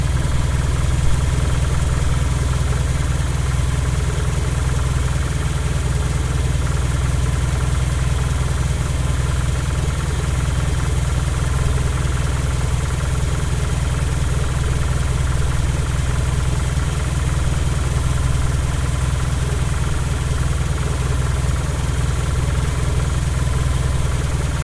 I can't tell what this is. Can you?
Car Engine
I hope you enjoy my soundeffects ! I recorded this on a rainy day with a H4n Zoom!
quality, Noise, Cars, Movie, high, Ride, Road, Engine, hq, Transport, Highway, Auto, motor, Move, Transportation, cinematic, Car, Film, Bus, Country, Drive, Countryside, Motorway